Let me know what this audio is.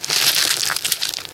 rip tear FLESH!!!!
Sounds like some sort of monster or animal tearing at flesh. I created this using organic Foley style sounds.